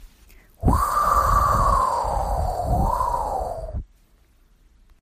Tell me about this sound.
wind breeze swoosh air gust
breeze, air, gust, wind, swoosh